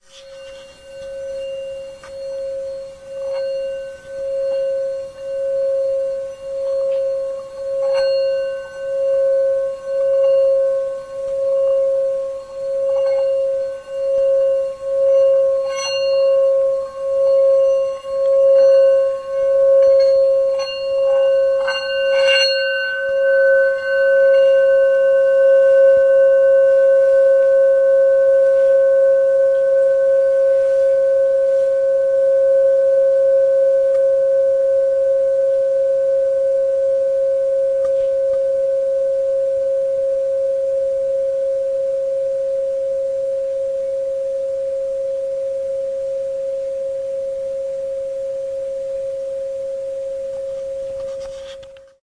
tibetan bowl2 251210
25.12.2010: about 14.00. my family home. the first day of Christmas. Jelenia Gora (Low Silesia region in south-west Poland).the tibetan bowl sound.
field-recording, tibetan-bowl, instrument, domestic-sounds, vibrate